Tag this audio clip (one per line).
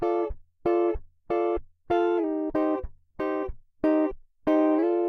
loop
reggae
upstrokes